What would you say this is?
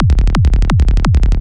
HiTech Bassline loop
A Kick + Bass slightly mastered. 172BPM using
use it anyway you want! I would appreciate a note if and where you use it but its not required! Have fun.
(There is a fitting hat loop in this package)
172BPM, bassline, HiTech, loop